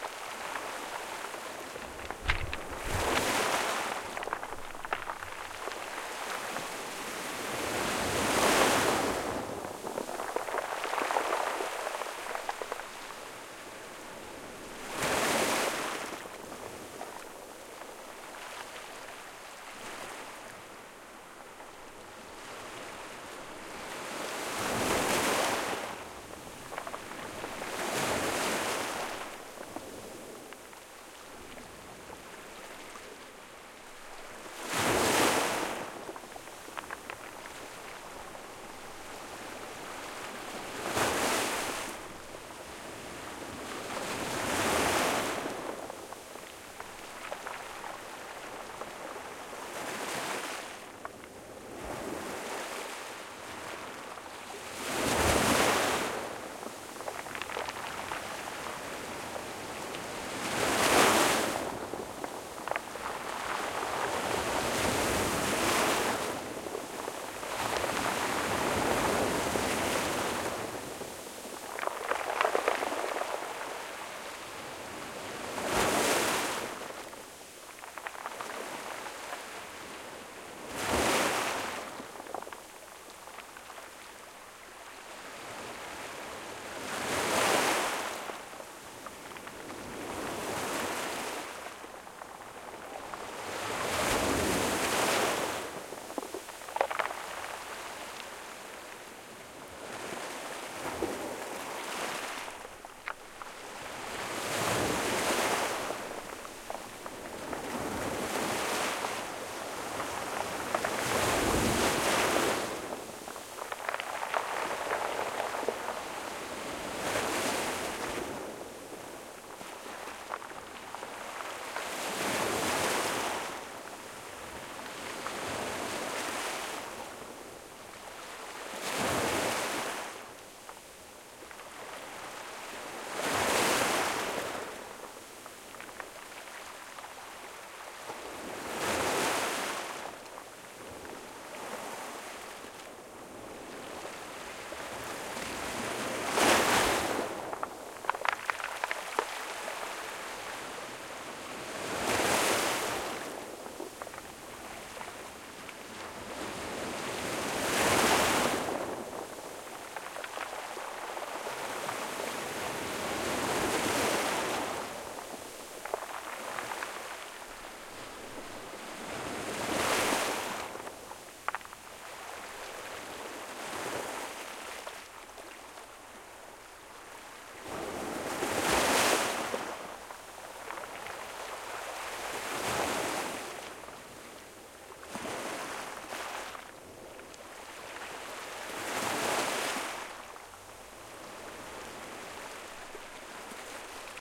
waves pebble beach frothy close1
waves pebble beach frothy close